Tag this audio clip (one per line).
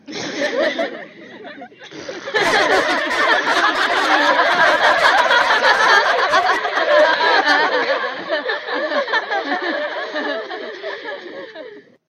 crowd
laughing
walla